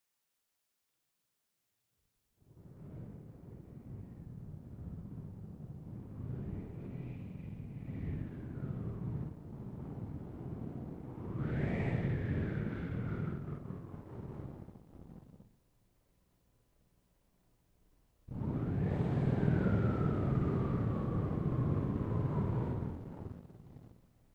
a short windy day.
empty, spooky, wind, windy, wistle, woosh